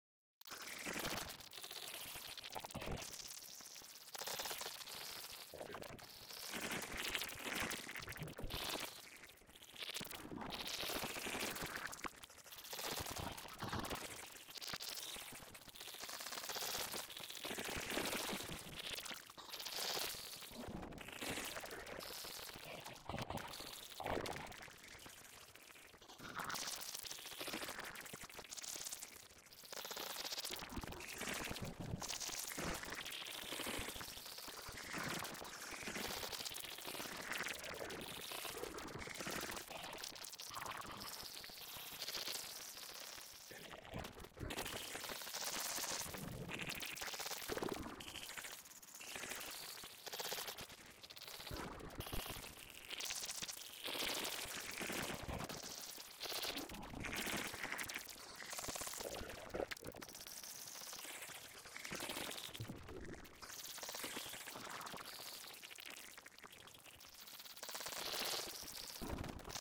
Creature Seizure
Simulated sounds generated from a vocal combination of syllables and then treated with Glitchmachines Fracture plugin. It sounded to me like a robotic insect decaying at a rapid pace.
electronic, audiodramahub, calculator, machinery, sci-fi, insect, cyberpunk, futuristic